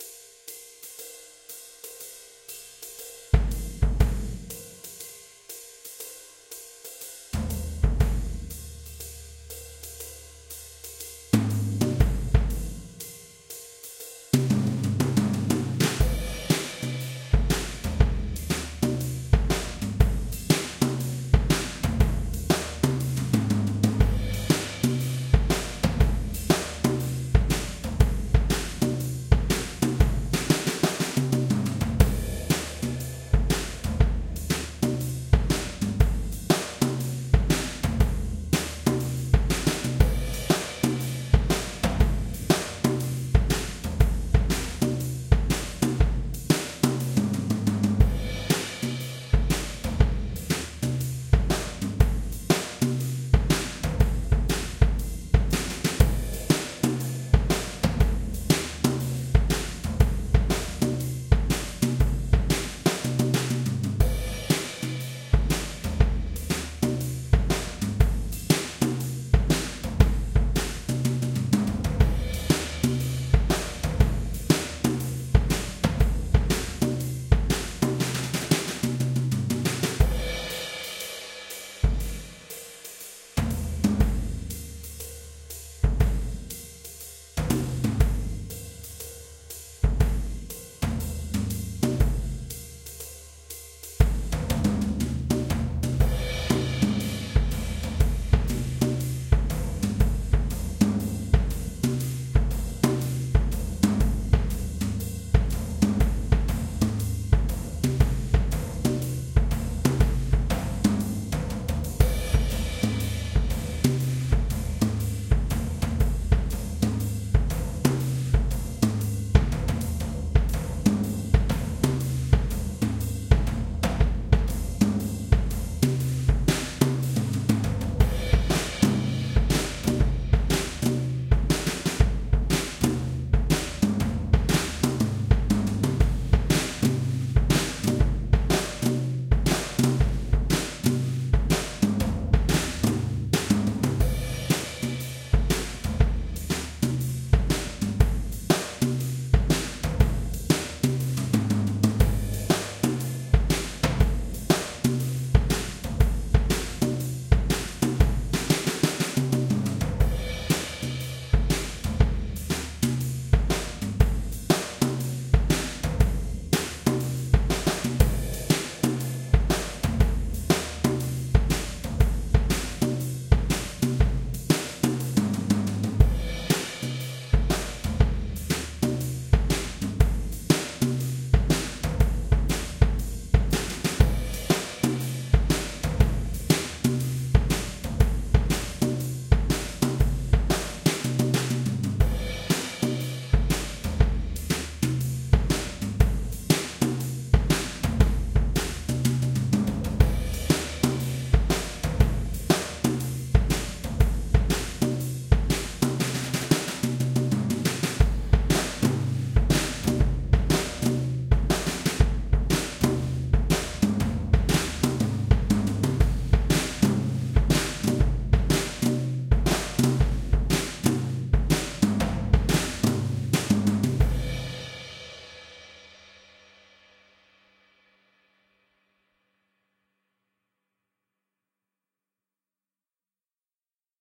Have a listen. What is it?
Jazz-Drum-Beat-120-BPM